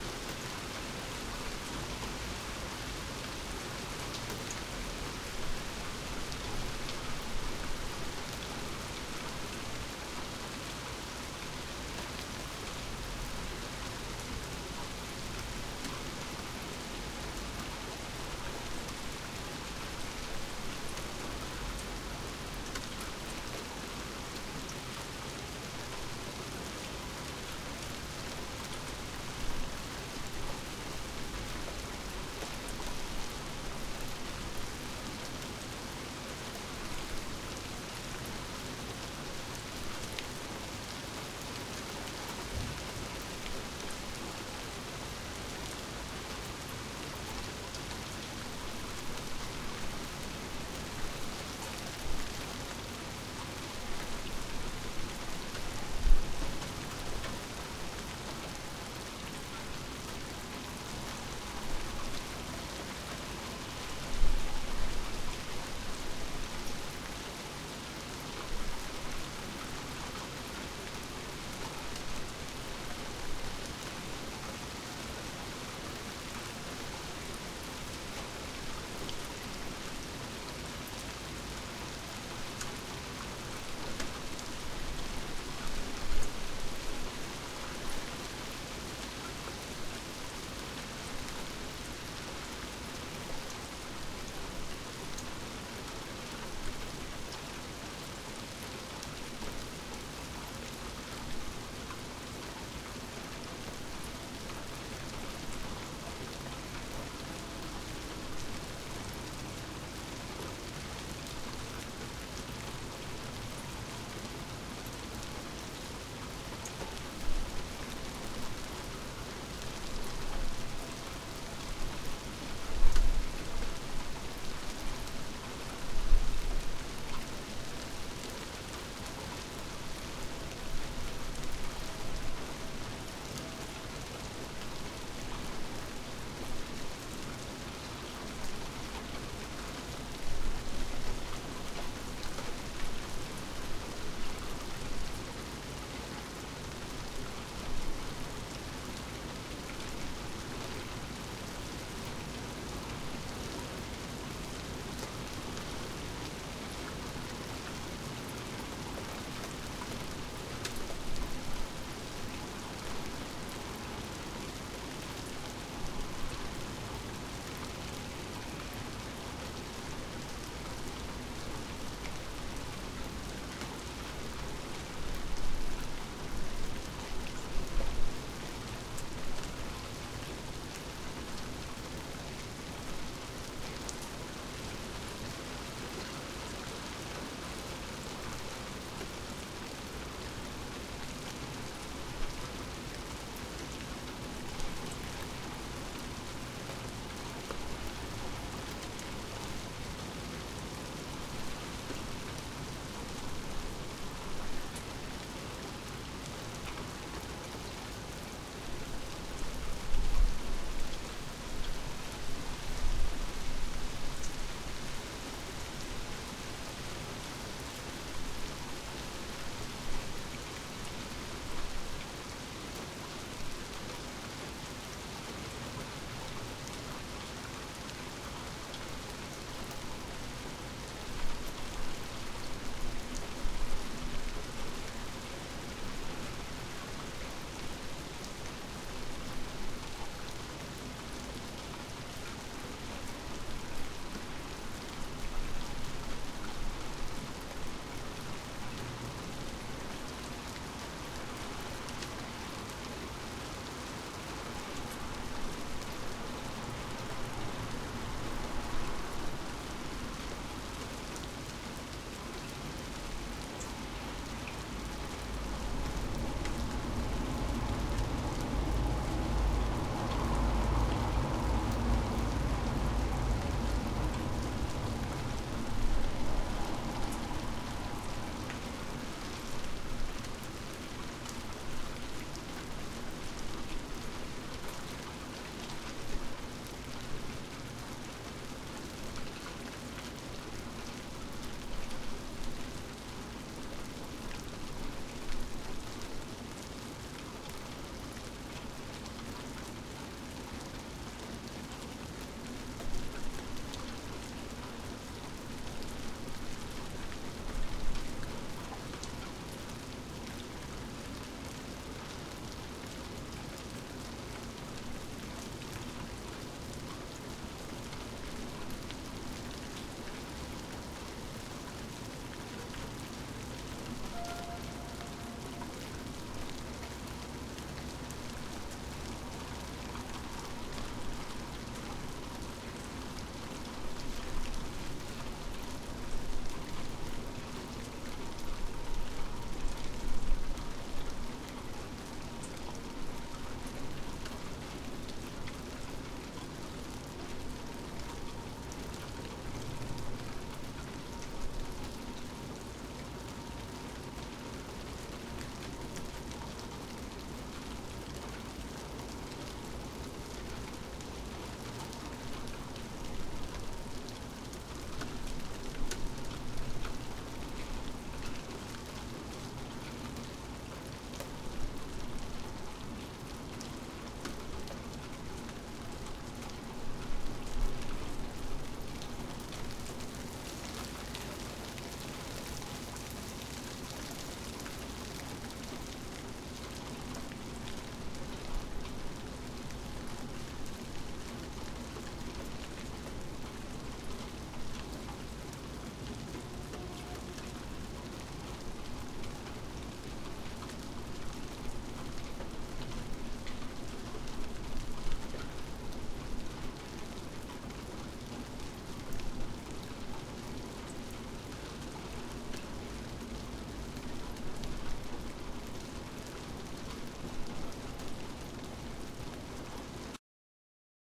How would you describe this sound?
Created using a Rode NT1-A directed out of my window towards a small park in a city past midnight. Really quiet environment in general, but some cars were passing by eventually.
Rain, Ambient, Night, City